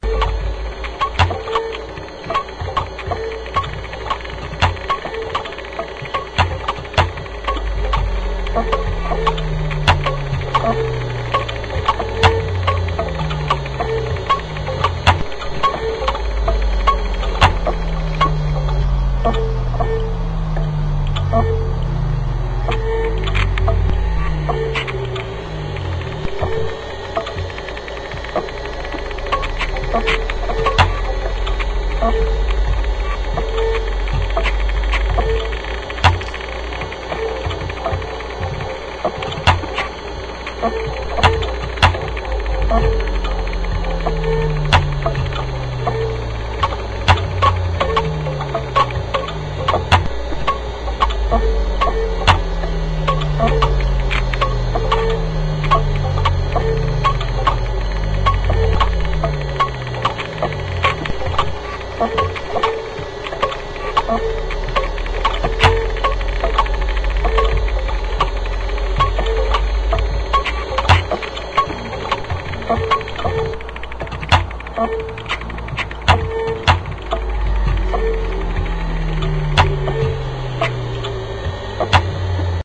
0 (Hard Drives Found Failing)
dead drives fighting for life, death coughs
corruption, noise